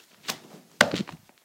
This sound might be suitable when dirt or stones are shovelled away.
stone, mud, wet, outdoor, ground, throw, digging, dirt, agaxly, dig, shovel